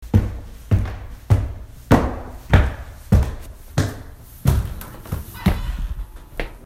walking up stairs